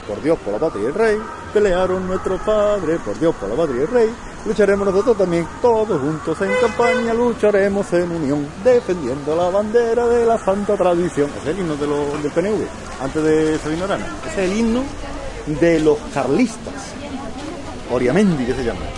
a friend sings (well, more or less...) the Oriamendi March and makes a comment, city noise in background. Olympus LS10 internal mics.